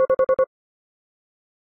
5 beeps. Model 2
beep, futuristic, gui